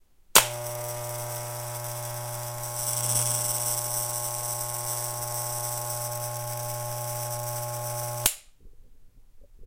Recorded with a condenser mic, an electric razor.